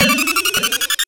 Custom Super Bad FX - Nova Sound
Super, Beat, House, Nova, Southern, Drum, Custom, Hat, Snare, Bass, Bad, Sound, Reason, Loop, Hi, South, Clap, Propellerheads, Rhythm, Kit, Kick, FX